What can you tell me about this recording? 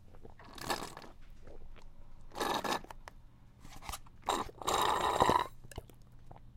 sipping a juice box
The last sips of a juice box through a straw.
drinking, juice-box, sipping, straw, tetra-pack